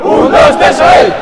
shout 1,2,3, sabadell3
Vocal energy sound saying the name of the club recorded after the match with the whole basketball team.